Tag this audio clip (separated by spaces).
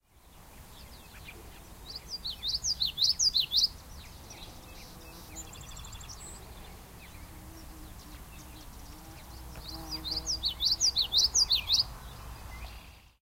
prairie nature field-recording common-yellowthroat